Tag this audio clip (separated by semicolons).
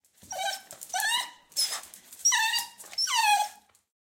CZ; Czech; Pansk; Panska